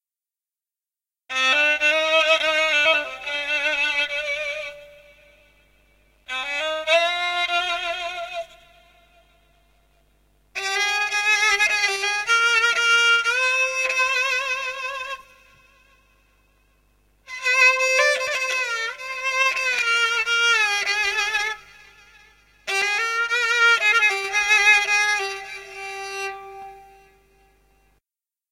This is a small fragment from a recording I made with a spike fiddle (or Persian Kamenche)